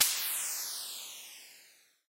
Balloon deflating 1
Sounds like something poking a hole in a balloon and letting it fly as it deflates, or when an air compressor fills up a tire or another inflatable object such as an expandable tube. Created using SFXR
8-bit, air, sfx, cutting, balloon, balloons, spike, computer, noise, arcade, poke, game, chip, retro, video-game, sfxr, deflation